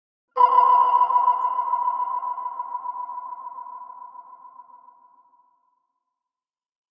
horror-effects hit suspense metal impact metallic percussion
metal, percussion, horror-effects, hit, suspense, impact, metallic